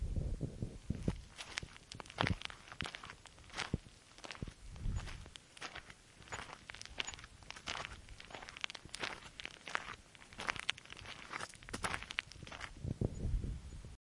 WALKING-ON-STONE-DRIVEWAY
Test recording walking along a stone driveway